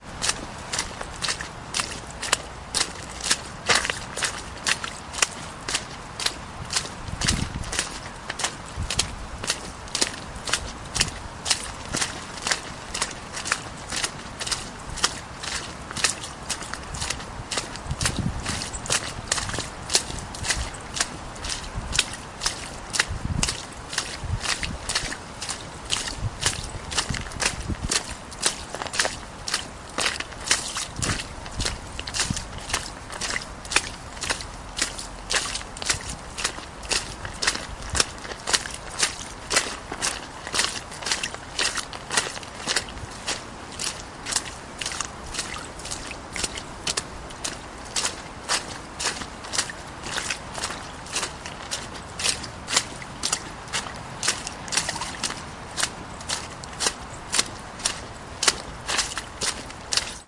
Raw audio of footsteps splashing in small puddles and some mud.
An example of how you might credit is by putting this in the description/credits: